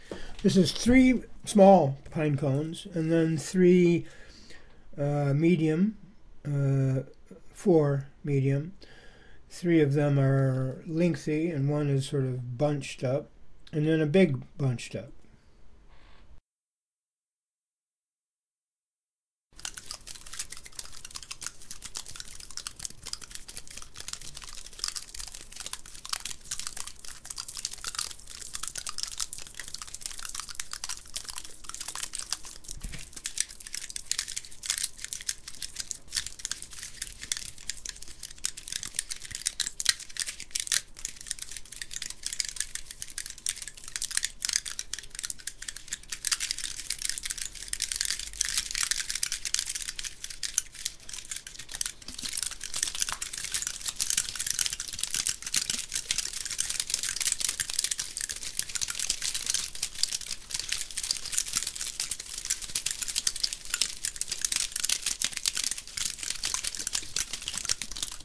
This is an overdubbed track of various Pinecones. I pressed my fingertips down along the sides of the Pinecone and make a fluttery sound of pitches.
Cone, design, Pine, sound, Texture